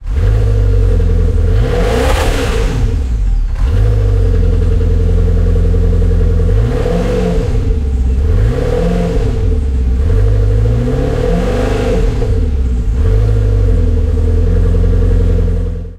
Maserati short
automobile, car, engine, ignition, sports, vehicle